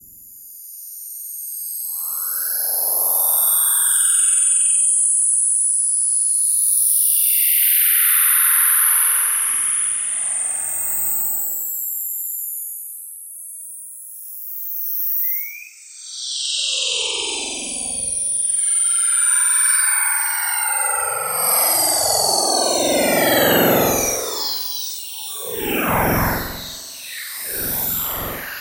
Fun with Bitmaps & Waves! Sweet little program that converts bitmap photos into sound! Added some reverb and stereo affects in Ableton.
ambiance, ambience, ambient, atmosphere, background, bitmaps-and-waves, electronic, image-to-sound, sci-fi, soundscape, trees